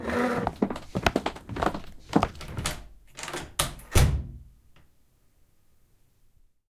Small group of people leaving a room
A chair scrapes on the floor; three people walk to a door, then open it and close it again. Uses the following sound files:
footstep, feet, walk, leaving, room, footsteps, leave, scrape, close, door, chair, people, walking, steps, open